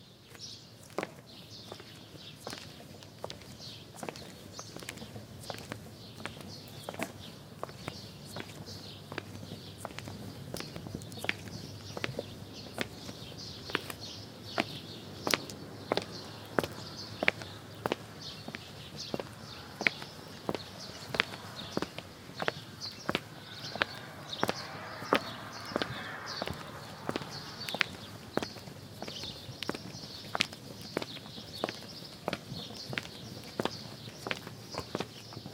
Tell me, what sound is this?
steps on stone ground in a village with bird
ambient, bird, footsteps, ground, stone, village